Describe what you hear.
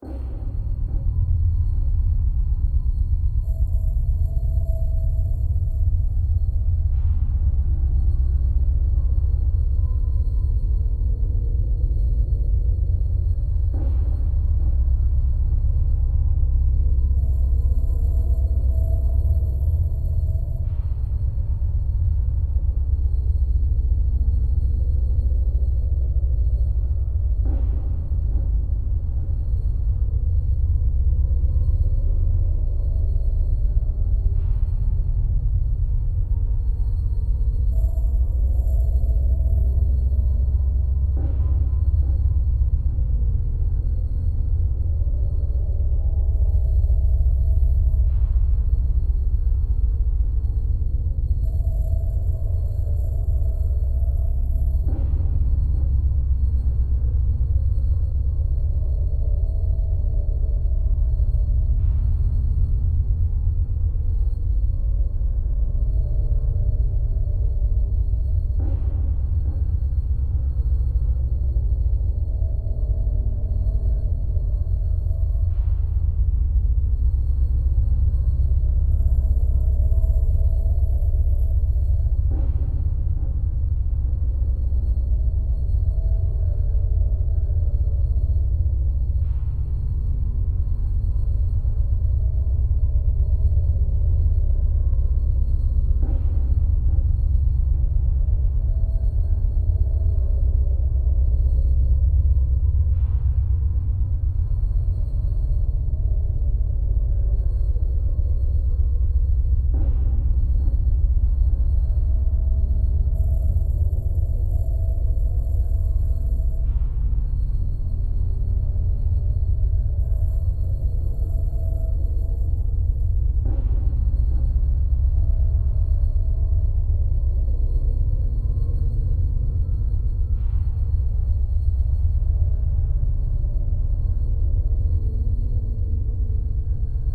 Atmosphere - Horror 2 (Loop)
A little horror-atmo i made with cubase 7. If you wanna use it for your work just notice me in the credits. So have fun with it.
16bit, Ambient, atmo, atmossphere, background, black, cold, creepy, dark, deep, dissonant, far, game, halion, horrific, Horror, loop, movie, moving, noise, rumble, sfx, sounddesign, soundscape, terrific, terror, wave